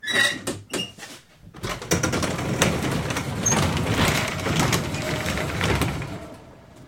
Door-Garage Door-Open-01
This is the sound of a typical garbage door being opened.
Large, Garage, Door, Metal, Open